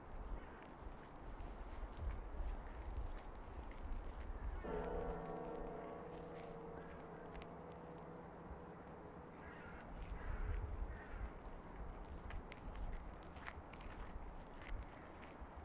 Walking away from Suzdal Cathedral of the Nativity while it chimes
Cathedral, chime, Nativity, ring, Russia, Suzdal